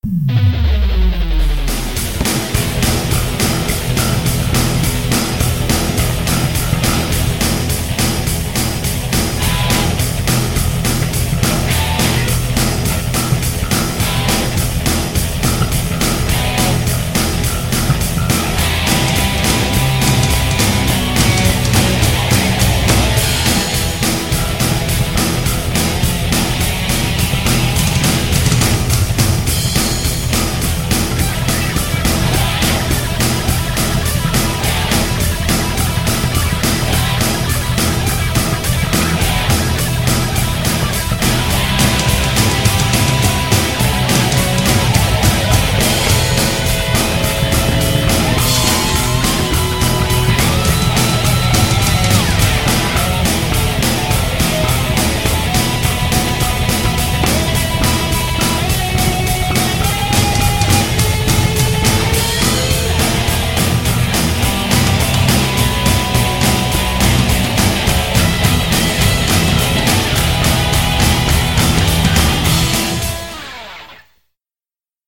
Rise-of-Mankind
I created these perfect loops using my Yamaha PSR463 Synthesizer, my ZoomR8 portable Studio, and Audacity.
My Original Music can be found here: